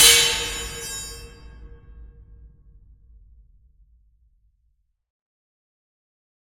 A sword clashing and locking with another sword dramatically